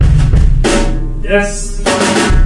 A hip hop style beat played with brushes, and a "yes" in the middle. Plus some effects.